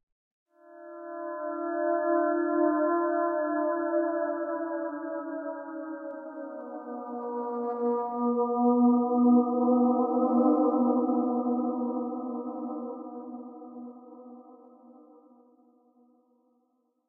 voices ew54b
Strange discordant voices. Part of my Atmospheres and Soundscapes 2 pack which consists of sounds designed for use in music projects or as backgrounds intros and soundscapes for film and games.
ambience, music, cinematic, atmosphere, electronic, voice, strange, processed, dark